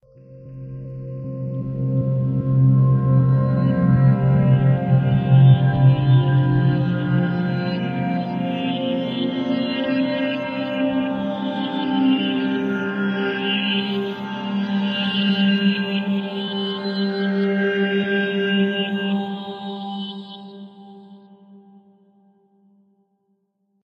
ambient synth moment